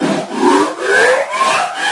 kidneyglidedownechoflangedistrev3dzz
Processed sound from phone sample pack edited with Cool Edit 96. Processed sound from phone sample pack edited with Cool Edit 96. Stretch effect applied then gliding pitchshift, echo,flanger and distortion reversed. Added 3D echos and zigzag envelope.
reverse, flanger, 3d, scream, mangled, echo, distortion